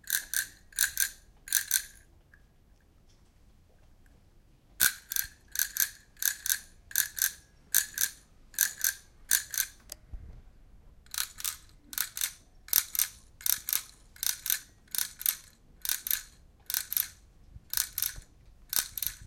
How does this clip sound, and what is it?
Cricket sound made with a bike ring.